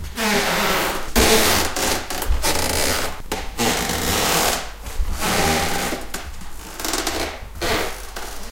The Floor in the night, from very old home, where I use to life until 2015. Record with Roland 26 R, Stereo/ Intern Mike.
House, Movie, Recording, Free, Film, Field, Home